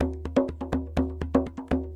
tambour djembe in french, recording for training rhythmic sample base music.